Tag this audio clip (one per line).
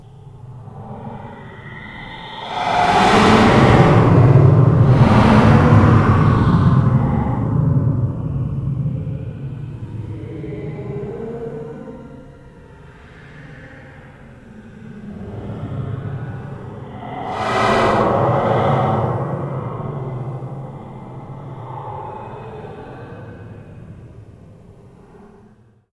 creepy
demon
fear
fearful
ghost
haunted
horror
nightmare
scary
slender
survival-horor